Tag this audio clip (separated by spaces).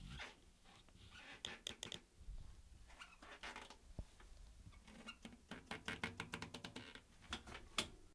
creak squeak